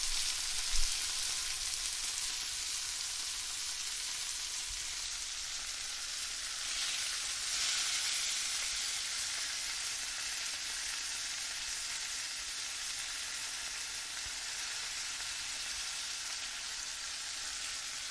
pan fry2
Frying meat on the pan
sizzle, pan, sizzling, cooking, meat, frying, oil